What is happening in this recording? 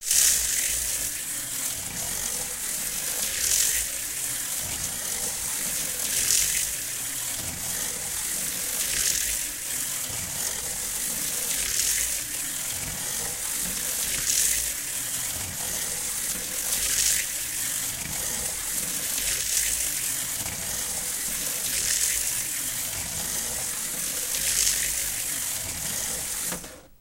slot cars02
The sound of a small electric toy car being "driven" around a small oval of plastic track. There are two places where the two parallel slots cross each other, accounting for some of the regular "click-clack" noise.
This particular car completes 9 laps before "crashing". It sounds heavier and "looser" than the car in sample 01.
Recorded using an M-Audio Micro-Track with the stock "T" stereo mic held about 6" above the center of the oval.
1960s, ho, electric, scale, toy, slot-car